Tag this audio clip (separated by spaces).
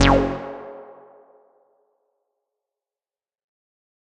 drum maschine percussion digital